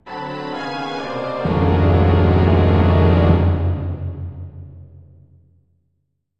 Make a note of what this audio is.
So I decided to create a few failure samples on a music-making program called Musescore. These are for big whopper failures and are very dramatic - they may also be used for a scary event in a film or play. For this project I used violins, violas, cellos, double basses, timpani, cymbals and brass. Enjoy!